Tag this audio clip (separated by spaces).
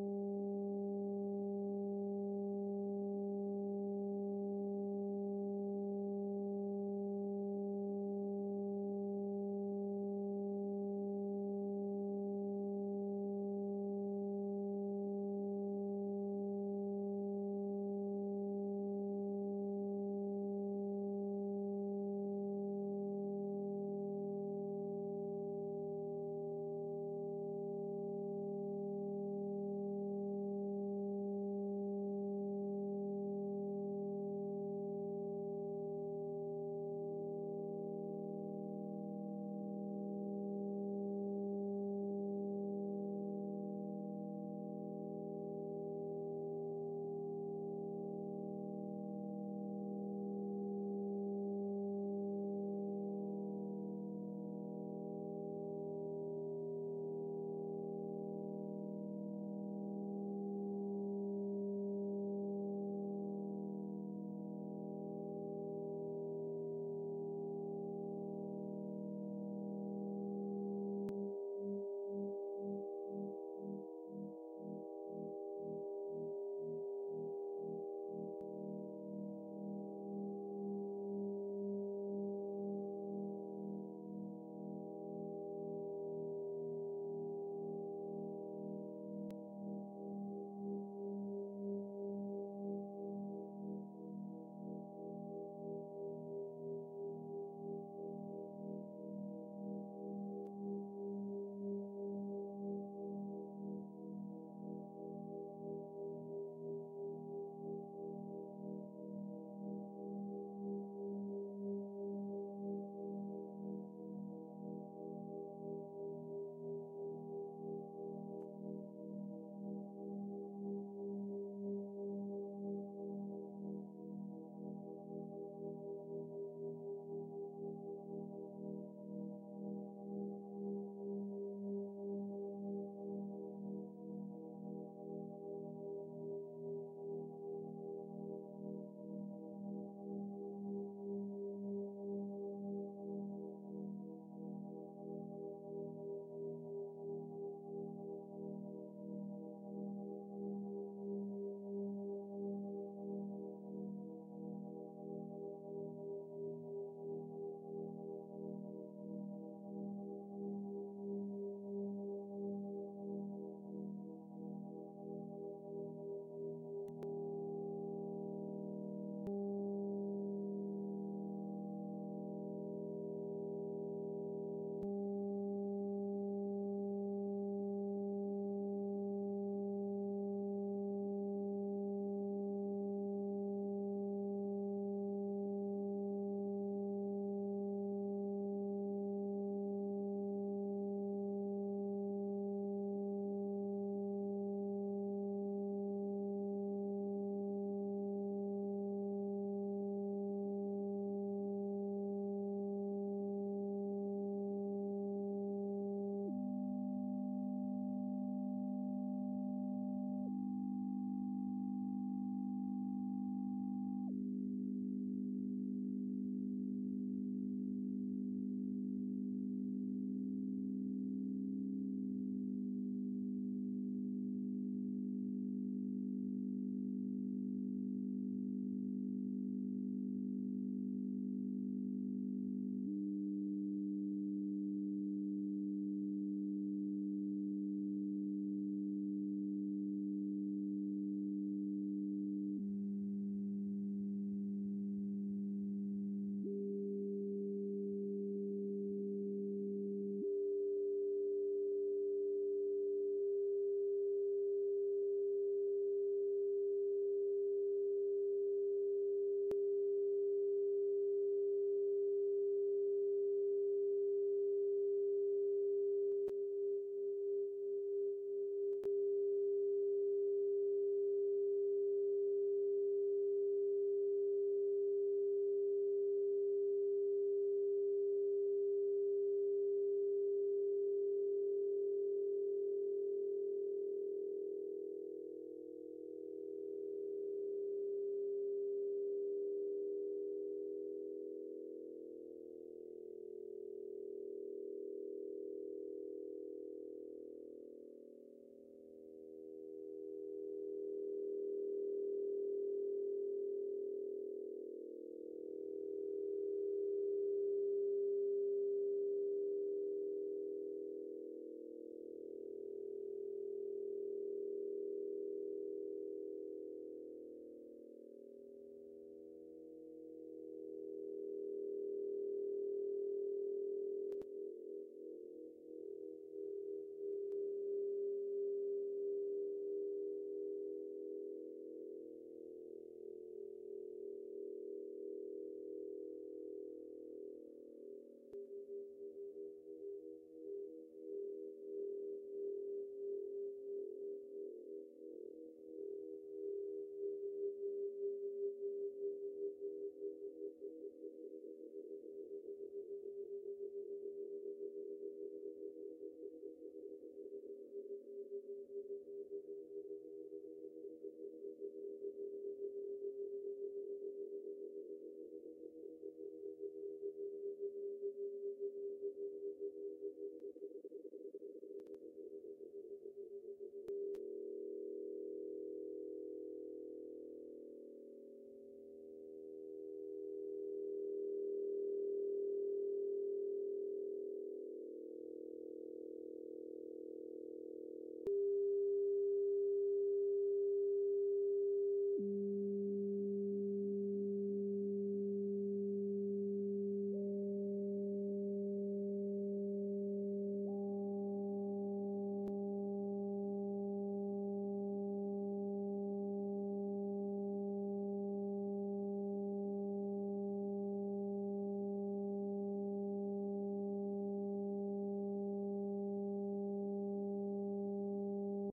experimental pm sam